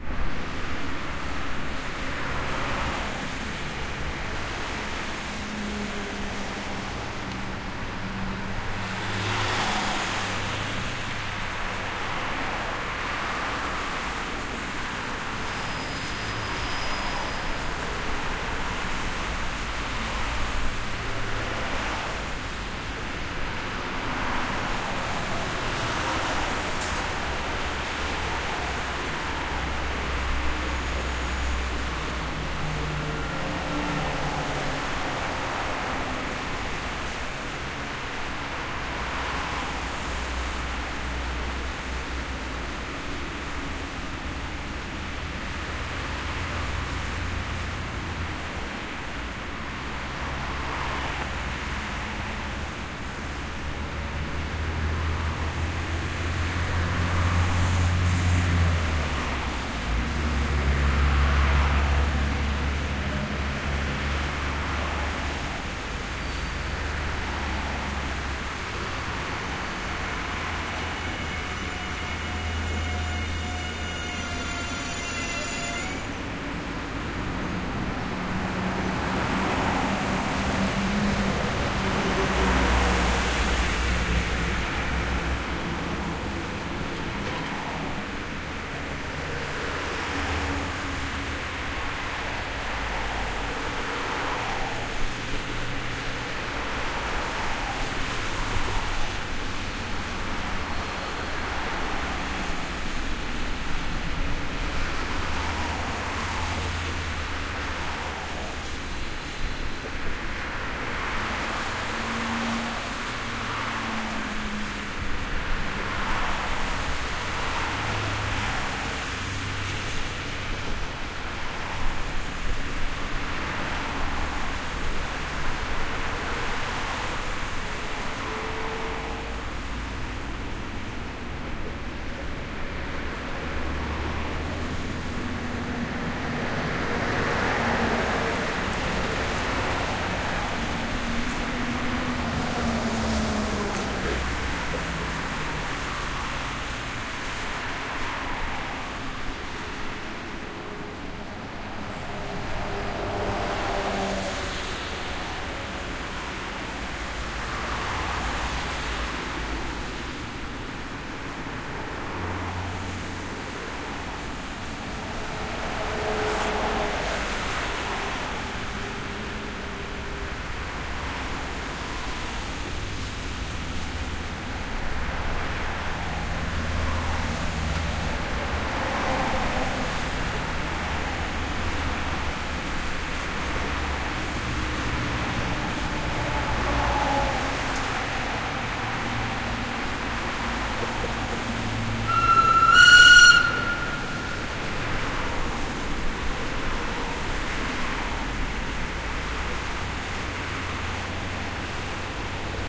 Verkehr (Zuerich auf Hardbruecke) auf Bruecke, grosse Stereobreite, permanent, dicht, Lastwagen, Auto, Busse
M-S Recording of a loud highway in Zurich City, lots of Cars, Trucks passing by. The Recording was made standing on the Hardbruecke, one of the largest bridges in Zurich.
car, dense, field-recording, loud, m-s-stereophony, traffic, train, truck, zurich